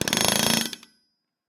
Pneumatic hammer - Atlas Copco r4n - Forging 1
Atlas Copco r4n pneumatic hammer forging red hot iron once.